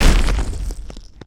An edited, impactful sound of concrete or the "boulder crashing noise" made from playing with the now demolished bits of rebar, concrete and dangerous refuse near Glasgow, Maxwell Drive. This recording was fairly difficult to attain and required layering, use of EQ to cut out the motorway sound.
2021: Nothing is left of the buildings except the flats are now apartments. So this sample is a bit of history.